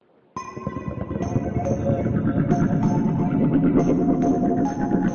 Jazz Voktebof Bells 2
drums, bells, clash, things, remix, retro, hmmm, whatever, loops